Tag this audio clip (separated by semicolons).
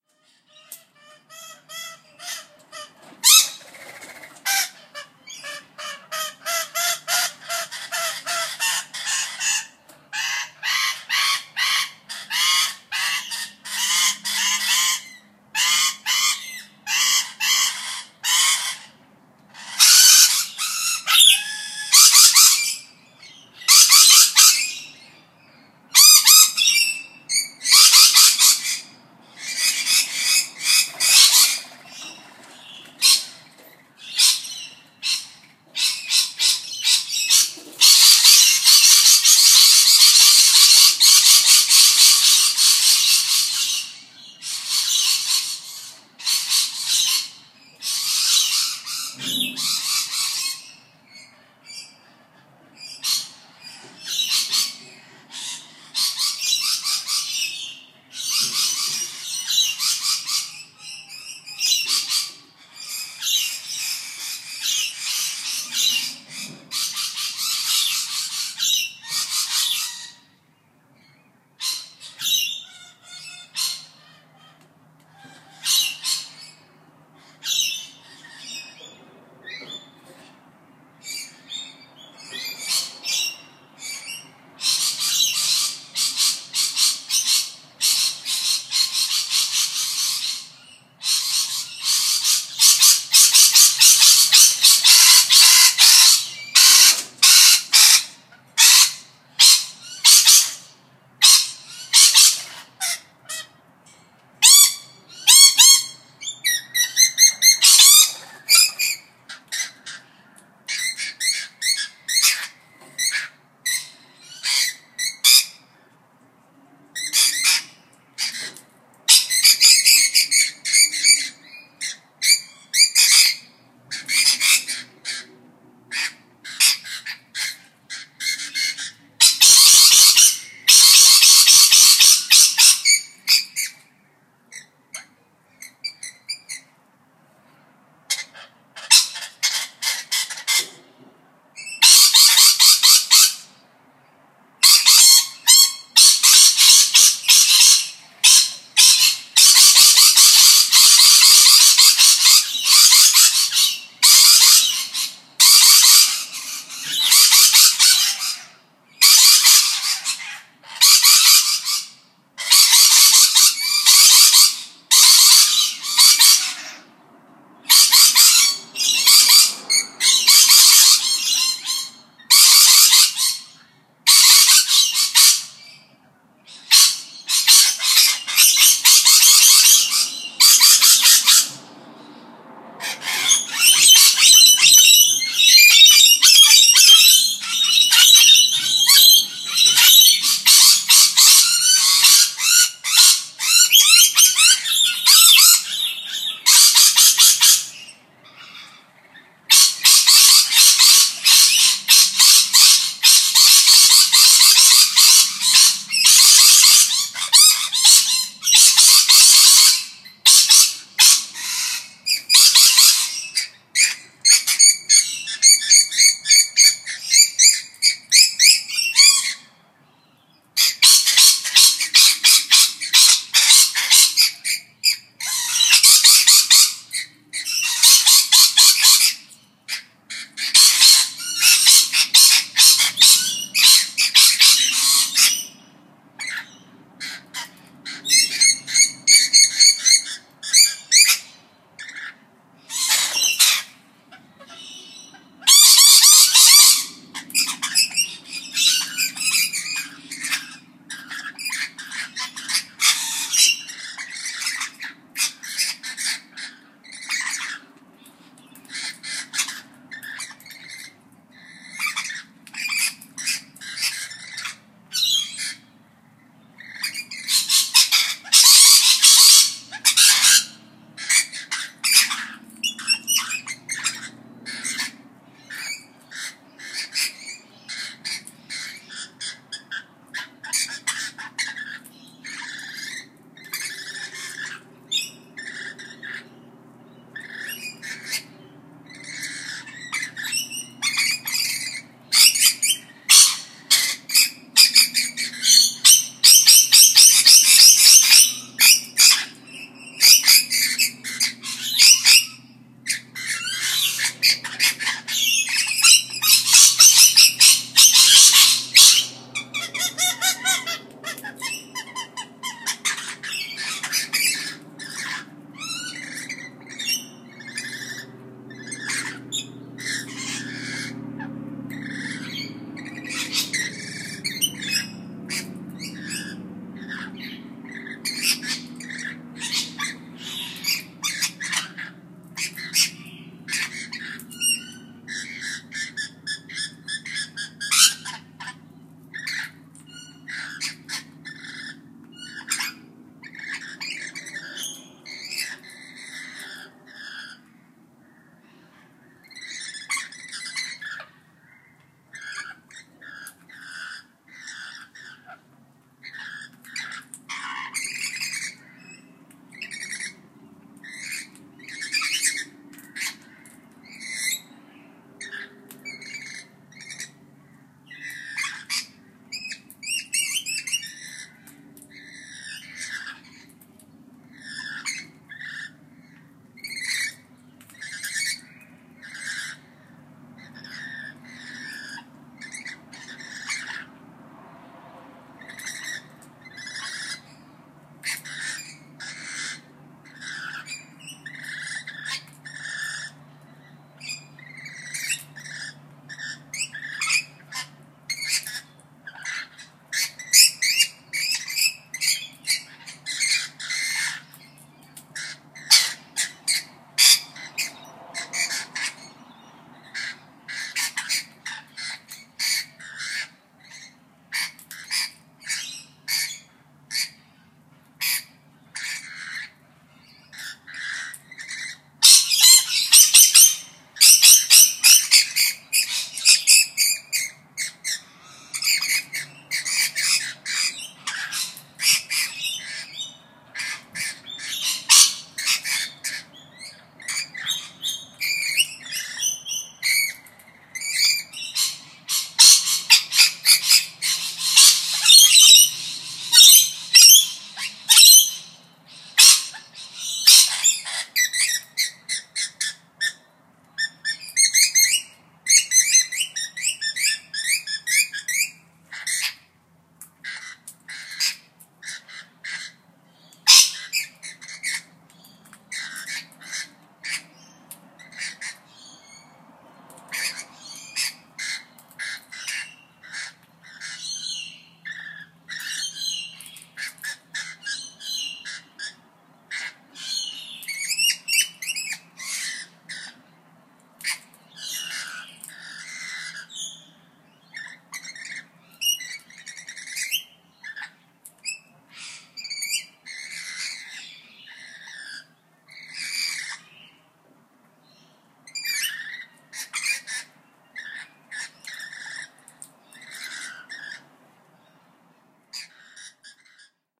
Parrot; Lorikeet; Food-Fight; Parrots; Birds; Sunflower-Seeds; Rainbow-Lorikeet; Rainbow; Australian; Food; Shrill; Shrilling; Australia; Chirping; Fight; Bird; Chirp; Seeds; Lorikeets; Tweet; Winged; Tweeting; Wings; Eating